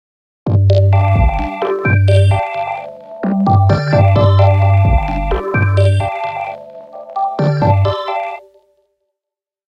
scrap synth part